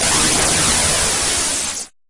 Attack Zound-45
A noise burst that goes down in pitch with some high pass filter
envelope on it. This sound was created using the Waldorf Attack VSTi within Cubase SX.
electronic, soundeffect